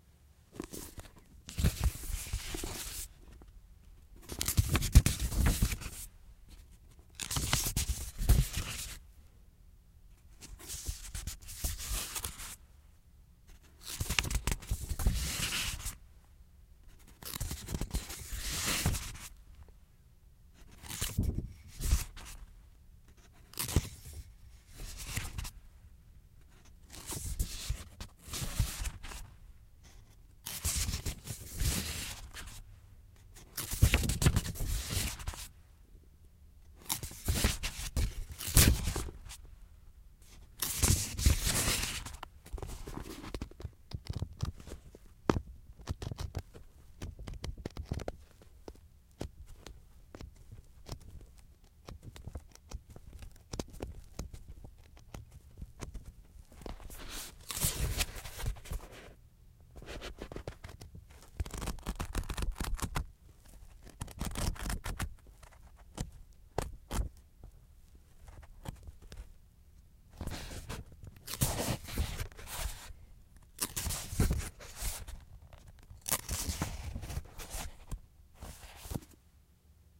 A recording of page turns and riffles. Recorded right up near the grille of the mic so perhaps not the most natural sounding recording out there. 80hz high-pass filter was applied with a 12db an octave slope. Normalized to -3db.
Signal Chain: CAD E100S and a Focusrite Scarlett 2i2.